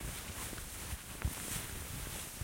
Clothes Rustling 2
Rustling some clothes on a Zoom Q4.
crumple, rustle, rustling, slide, soft, swoosh